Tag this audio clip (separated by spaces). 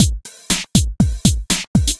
beat electro krautrock motorik